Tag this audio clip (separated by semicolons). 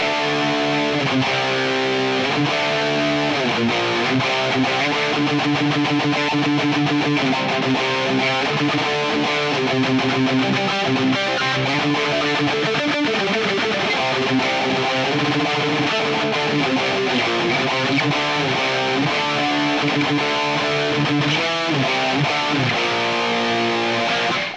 Guitar; Loop; Distorted